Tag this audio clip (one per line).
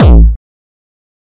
techno
melody
hardcore
beat
distorted
distortion
hard
kickdrum
progression
trance
bass
synth
kick
drumloop
drum